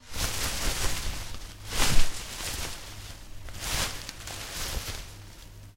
HOT SIGNAL.Leaf sounds I recorded with an AKG c3000. With background noise, but not really noticeable when played at lower levels.When soft (try that), the sounds are pretty subtle.
shrubbery, bushes, noise, bush